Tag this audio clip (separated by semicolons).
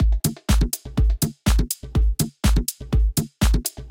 tribal; loop